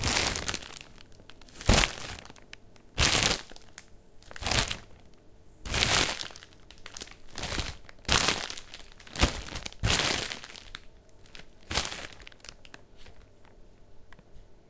Grabbing, picking up and putting down a plastic bread bag a few times.
grab, bread, put-down, pick-up, crinkle, impact, bag